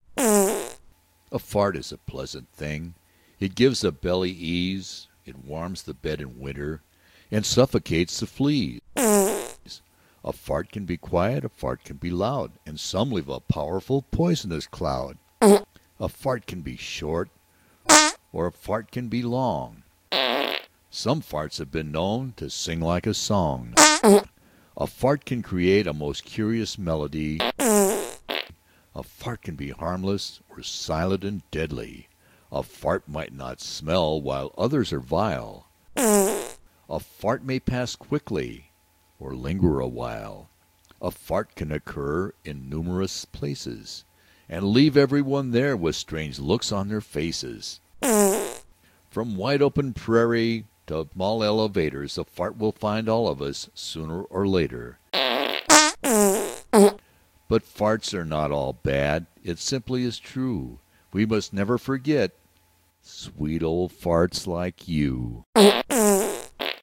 Poem with farts
Poem about farts with effects from
fart, farts, male, voice, voice-over